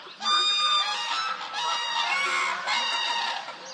strident bird calls at Canada de los Pajaros, a bird sanctuary near Puebla del Rio, S Spain. The sounds somehow made me think of a Jurassic ambiance, hence the file names. Sennheiser ME66+MKH30 into Shure FP24, recorded with Edirol R09. Decoded to M/S stero with Voxengo free VST plugin, otherwise unedited.